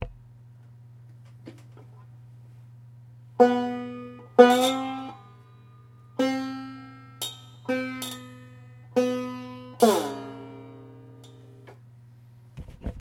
banjo tuning
Tuning a banjo string up and down.